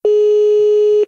The beep on my phone in NL when calling someone and waiting for the person to answer.
beep, phone